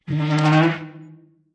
Processed recordings of dragon a chair across a wooden floor.